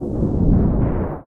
gas.whooosh
Whoosh of air.
you can support me by sending me some money:
air, balon, baloon, gas, hiss, hot, ignition, luft, swiish, swish, swoosh, whoosh